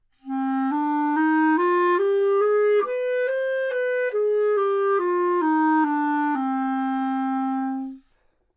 Clarinet - C natural minor
Part of the Good-sounds dataset of monophonic instrumental sounds.
instrument::clarinet
note::C
good-sounds-id::7665
mode::natural minor